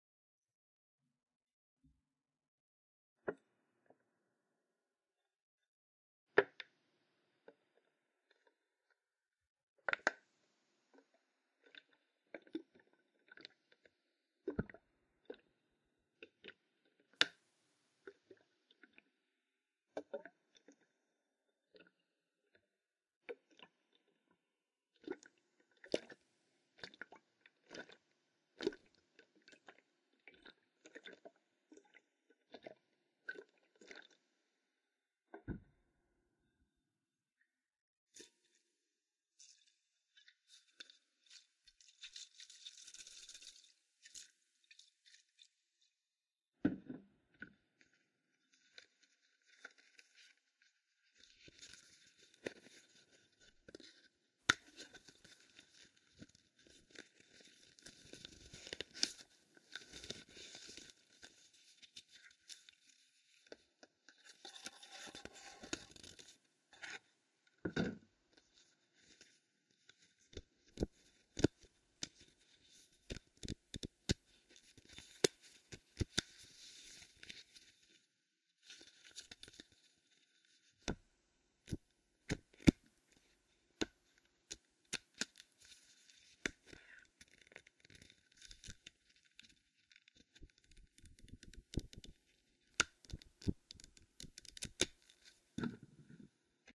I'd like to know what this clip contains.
waterbottle tilted
slowly tiling a bottle of water to make gulping-sounds
bottle, water